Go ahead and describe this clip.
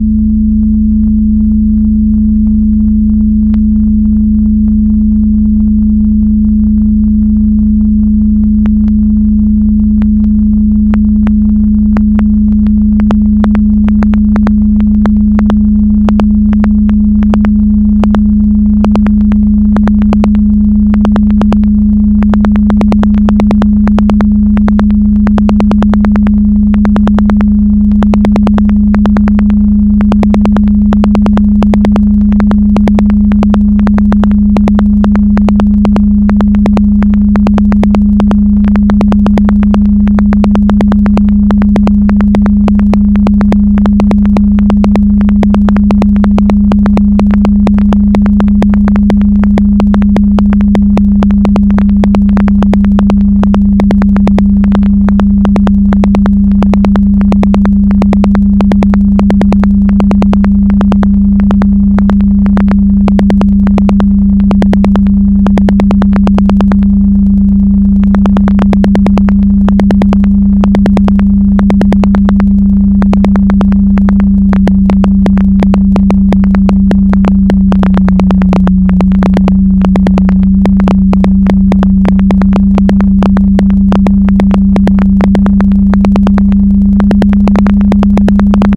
system 100 drones 8
A series of drone sounds created using a Roland System 100 modular synth. Lots of deep roaring bass.
ambience, analog-synthesis, background, bass, bass-drone, deep, drone, low, modular-synth, oscillator, Roland-System-100, synthesizer, vintage-synth